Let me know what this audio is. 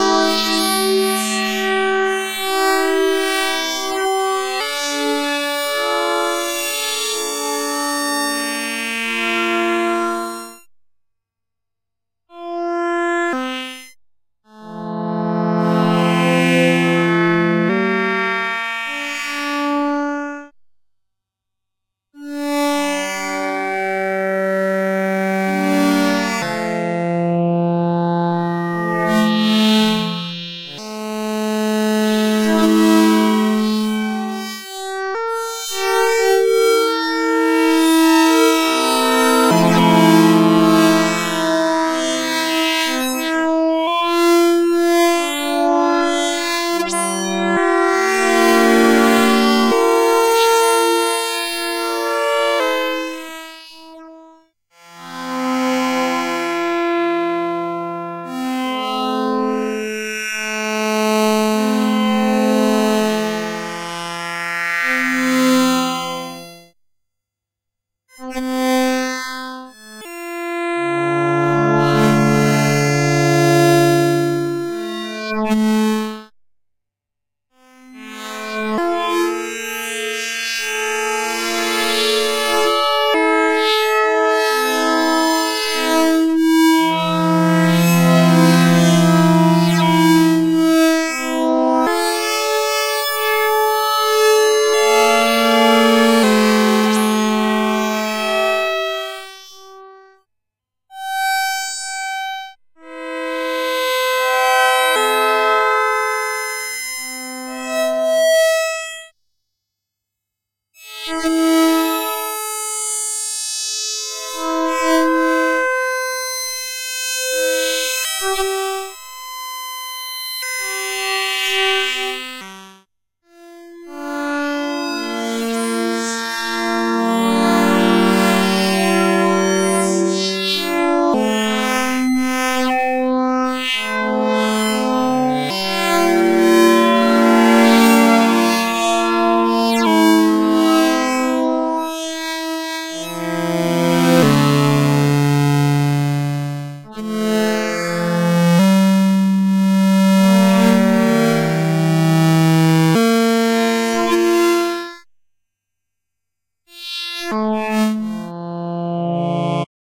DivKid 4 Voice Ambient Reel - DRY ONLY
Created by DivKid for use in the Make Noise soundhack Morphagene.
There are Dry-Only, FX-Only, and Mix versions of this Reel in the pack.
Patch Walkthrough
The patch starts with the Qu-Bit Chance providing discrete random values (sample and hold) going into an Instruo Harmonaig. This takes the stepped random voltages and quantizing them to a given scale. I put in the notes C D Eb F G Ab Bb which is a C natural minor scale, the relative minor of Eb major (for anyone that's curious). However like most of my modular work I didn't actually tune the oscillators to anything specific. So treat the scale as a pattern of intervals not a set of specific notes. The quantized notes then form 4 voice chords giving us a root, third, fifth and seventh CV output that will be diatonic following the scale pattern, meaning the third will be major or minor, the seventh major, minor or dominant and the fifth natural or diminshed to suit the scale.